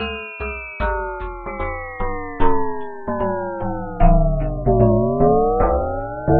Zajo loop33 looser
remix of a downtempo beat added by Zajo (see remix link above)
filter and vocoder
beat, breakbeat, compression, delay, downtempo, drum, drunked, dub, effect, electro, filter, fx, hiphop, loop, looser, mix, percussive, phaser, phat, processing, remix, strange, vocoder, weird